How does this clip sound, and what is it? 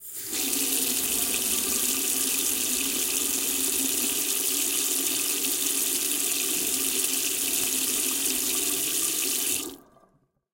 12 Water Tap
Water flowing through a water tap
Czech, Tap, CZ, Panska, Sink, Water